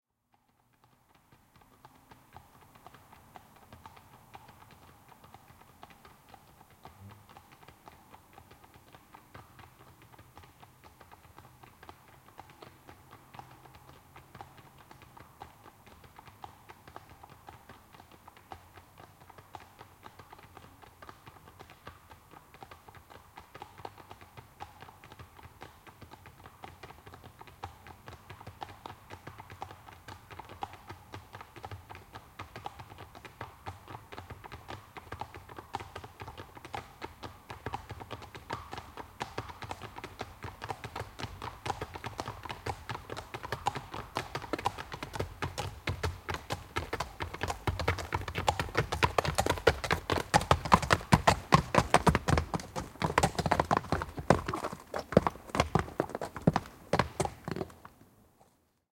Hevoset, tulo, kaviot / Two horses approaching walking on asphalt, stopping, hooves clattering
Kaksi hevosta lähestyy käyden asfaltilla, pysähtyvät, kavioiden kopsetta.
Paikka/Place: Suomi / Finland / Kitee, Sarvisaari
Aika/Date: 12.07.1982